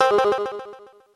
one-shot samples recorded from broken Medeli M30 synth
oneshot, drums, broken